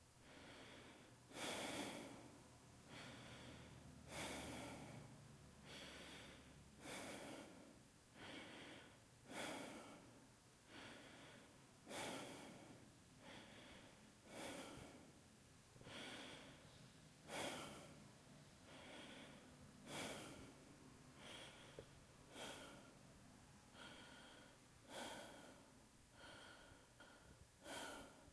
Deep Heavy Breathing 1
A quick recording of myself breathing deep and hard for foley. Recorded on the zoom H5 stereo mic. I cleaned up the audio and it is ready to be mixed into your work! enjoy!
running, clean, zoom, stereo, high, hit, quality, breath, denoised, heavy, human, man-breathing, edited, h5, hyperventilating, tink, sound, zoom-h5, foley, field-recording, breathing